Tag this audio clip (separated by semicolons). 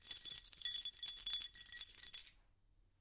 0; sounds